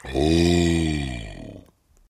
Demon Oooh
A demon saying 'ooooh'.
Satan, Hell, Demonic, Devil, Gasp, Hellish, Breathing, Chirp, Imp, Idle, Demon, Monster, Breathe, Moan, Idling, Breath